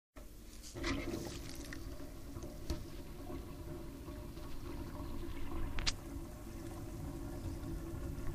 2014-desaigüe-pica-rentar-plats
L'aigua corre pel desaigüe de la pica dels plats.